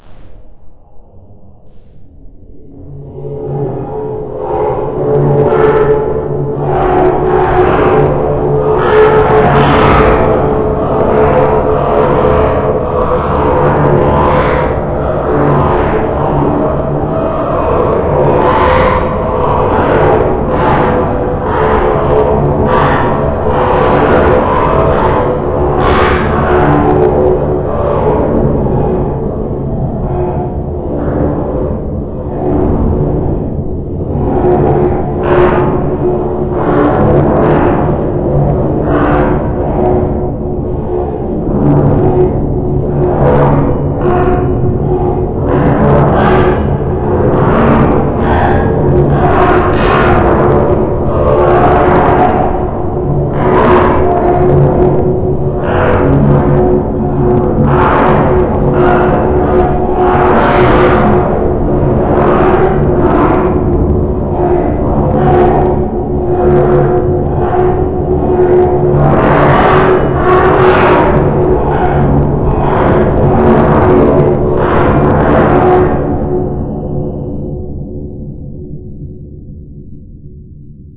The sample is initially the above sample made from
the bird sounds, with the difference that this sound
has been processed through the 10-magnitude harmonic
generator which is part of the full Audacity pack.
Sound has more loudness.